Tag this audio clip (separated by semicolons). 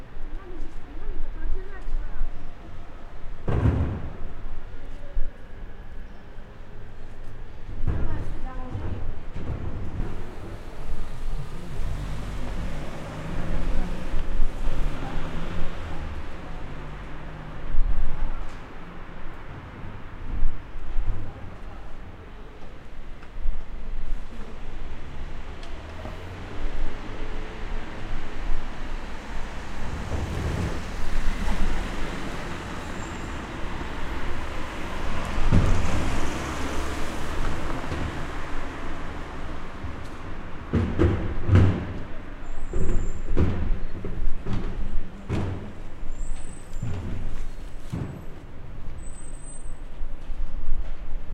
paris; street; night; car; unloading; truck